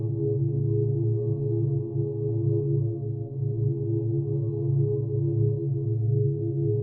Loopable hum
This is a sci-fi/eerie hum which can be looped. I made this by recording myself playing my tin whistle.
I then trimmed and edited the recordings with paulstretch and other effects.
•Credit as Patrick Corrà
•Buy me a coffee
alien, eerie, fiction, hum, loop, loopable, science, science-fiction, soundscape, space, spaceship, starship